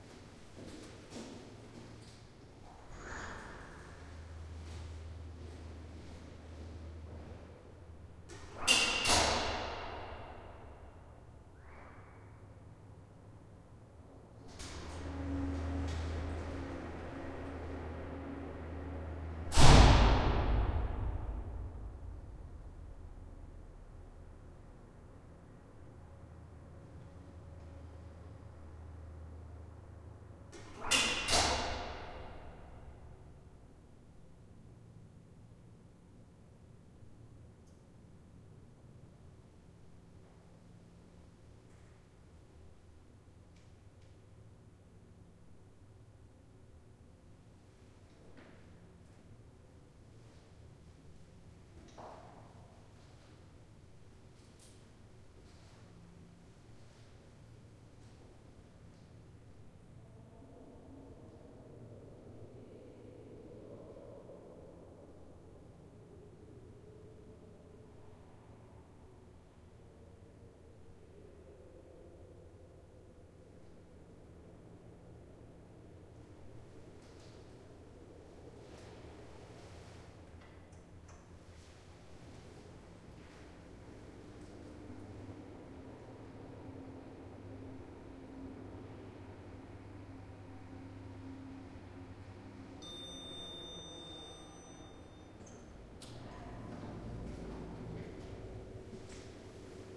ambient recording of the stairwell off a parking garage in the city center of leipzig/germany. the doors to the parking deck are opened and shut twice, then the elevator is called down.this file is part of the sample pack "garage"recording was done with a zoom h2 using the internal mics with a 90° angle.
ambient, city, door, echo, elevator, field-recording, garage, hall, indoor, large-room, slam